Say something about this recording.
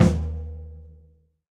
tom snr
a percussion sample from a recording session using Will Vinton's studio drum set.
percussion, studio, hit, snare